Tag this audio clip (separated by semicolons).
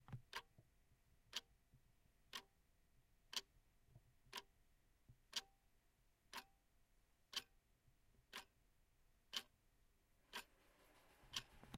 clock; tac; tic; tic-tac; time; wall-clock